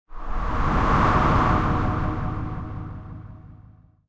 A windy transition created by using FM synthesis. Recorded with Sony Sound Forge Audio 10.
abstract, digital, effect, electric, freaky, future, fx, noise, sci-fi, sfx, sounddesign, soundeffect, strange, suspense, transition